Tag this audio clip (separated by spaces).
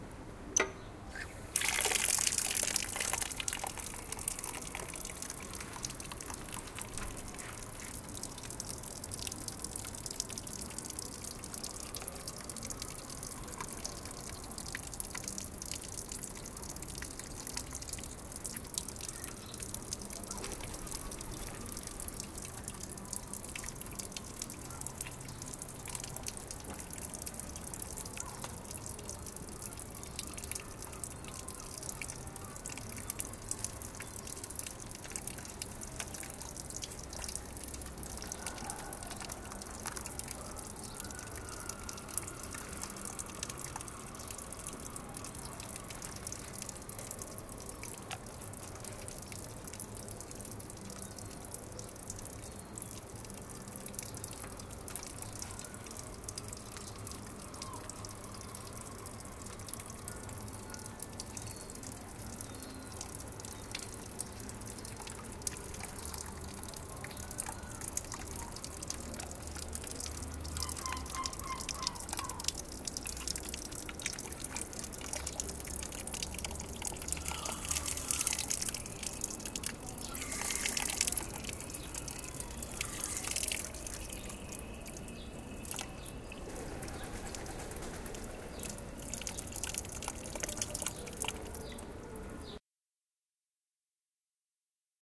porto water